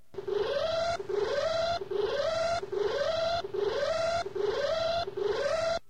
fan alarm loop
Loop that sounds like an alarm made from broken fan noises. This is part of a pack that features noises made by a small malfunctioning house fan that's passed its primed.
malfunction, squeal, alarm, broken, abuse, public, defective, domain, fan